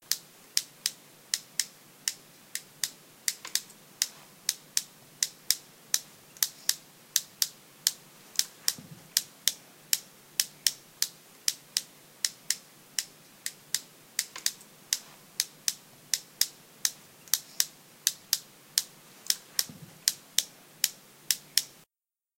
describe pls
mySound GWAEtoy pairofpliers
Etoy, colour, mySound, texture